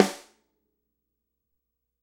dry snare center 09
Snare drum recorded using a combination of direct and overhead mics. No processing has been done to the samples beyond mixing the mic sources.
multi, instrument, acoustic, real, dry, snare, stereo, velocity, drum